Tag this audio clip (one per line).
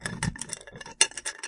fixing
industry
metal
percussive
rattle
repair
spanner
T
Wrench